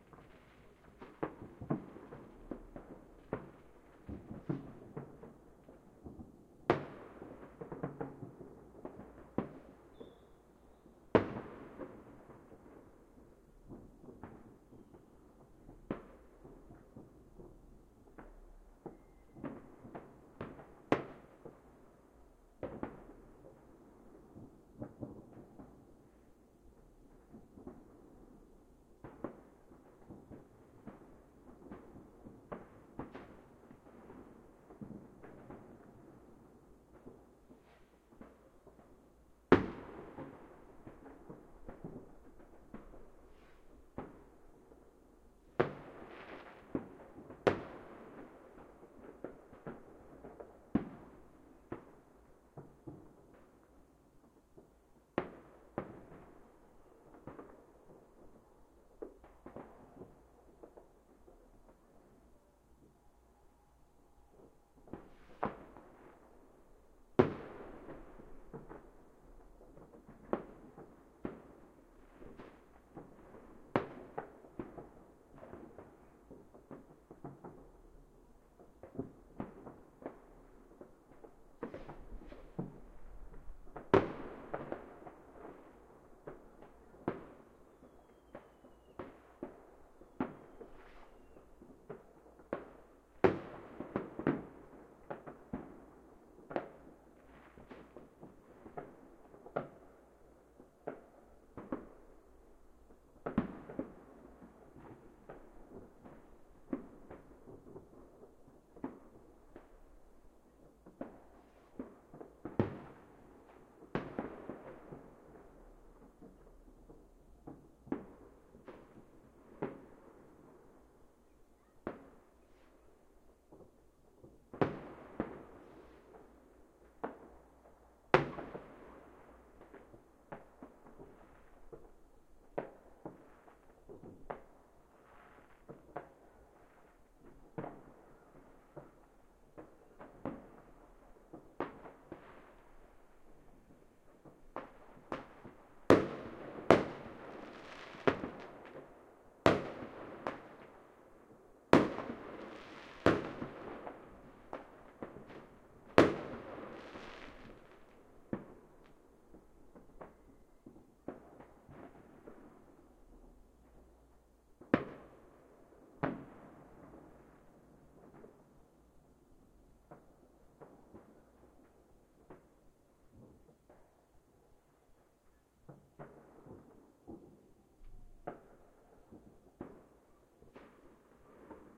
Distant Fireworks, recorded with a Zoom H1.